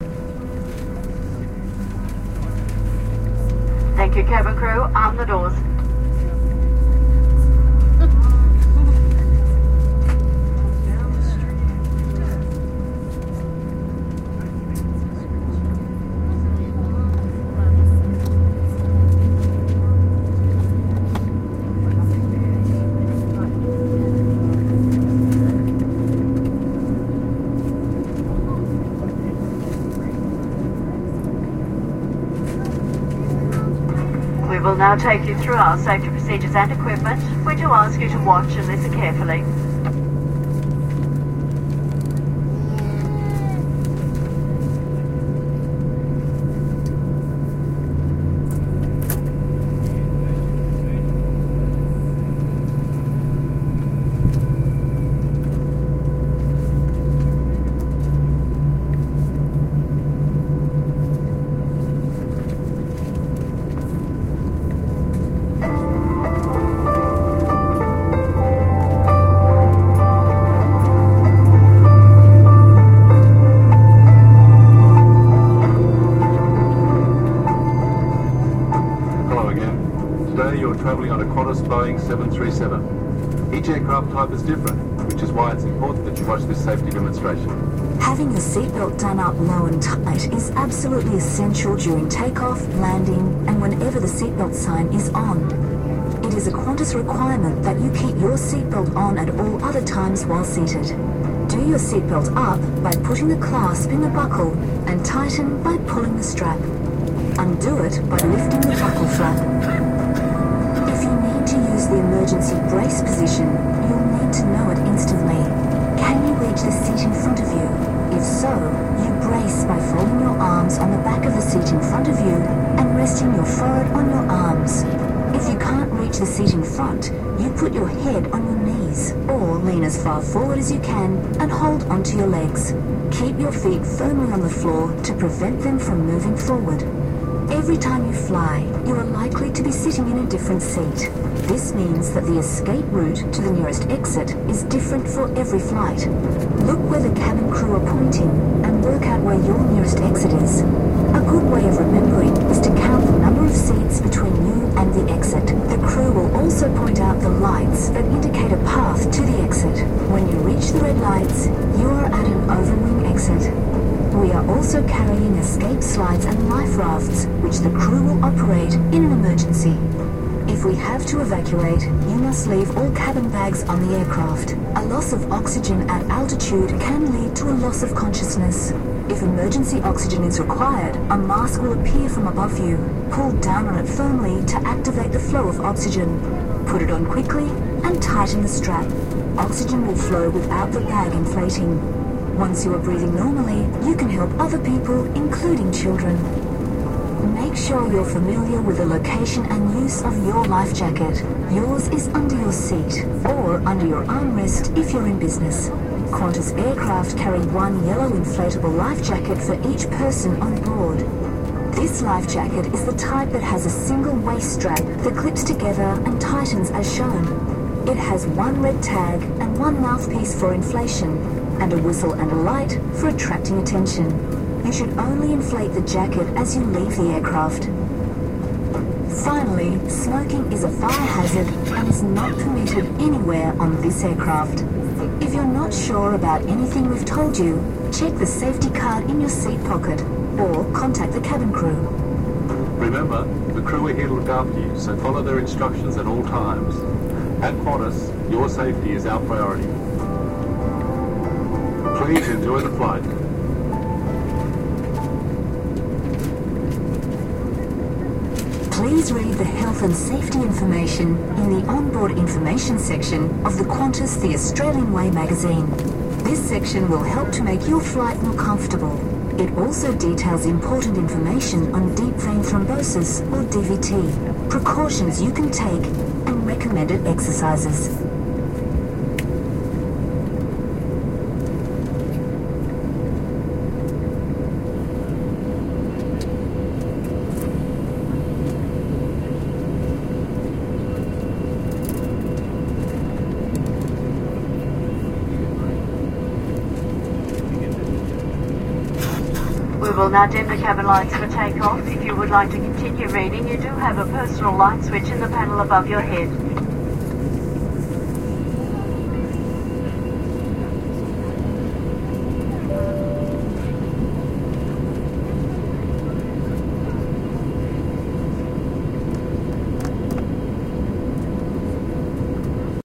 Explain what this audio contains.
Qantas City Flyer 670 - Safety
Safety announcement. Recording chain - Edirol R09HR internal mics.
aeroplane, aircraft, airplane, ambience, announcement, boeing-737, cabin-noise, field-recording, jet, qantas